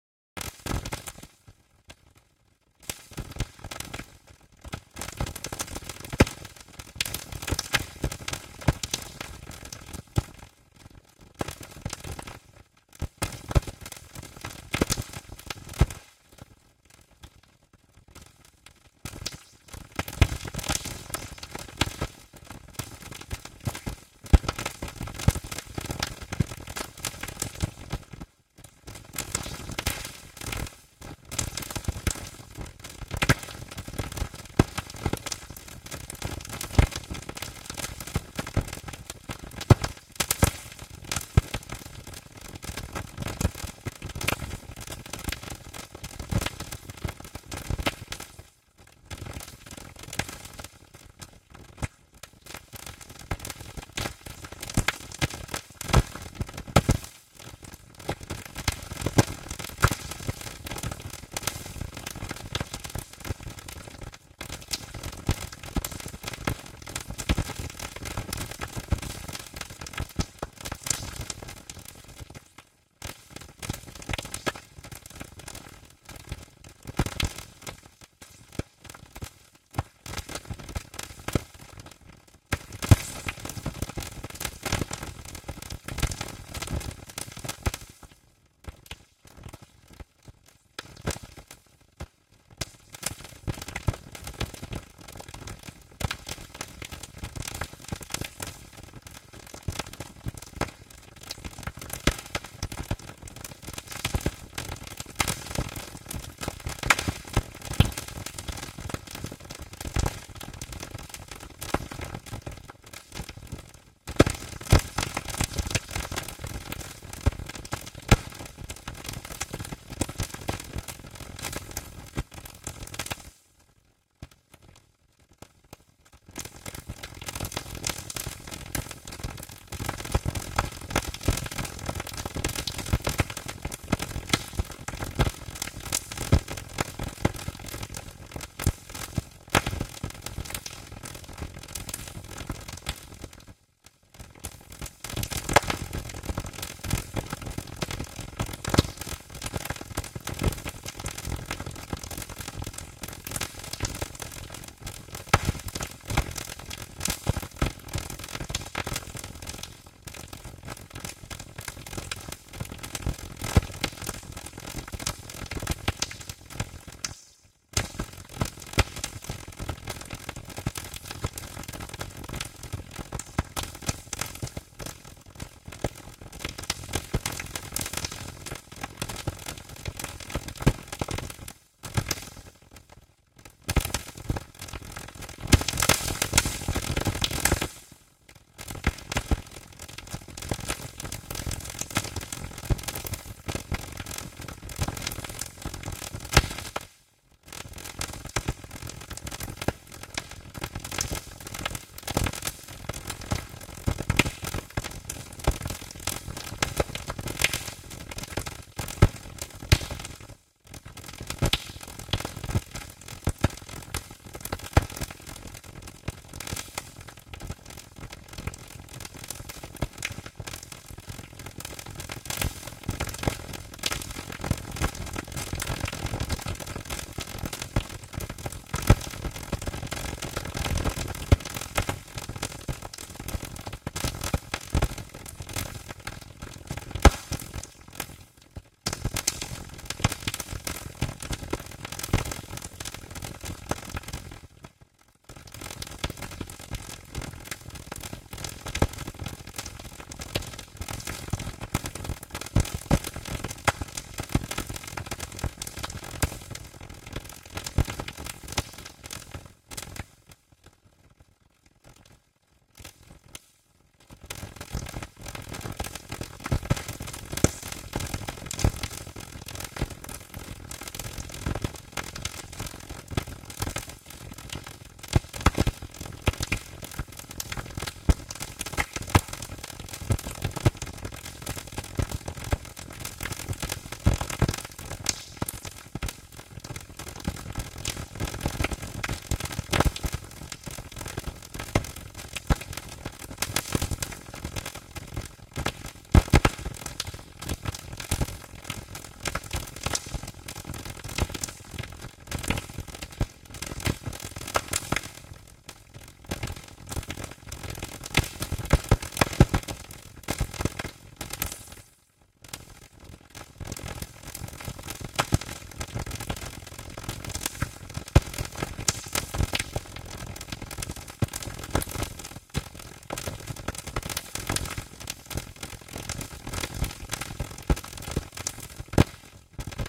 A hydrophone recording manipulated by granular synthesis.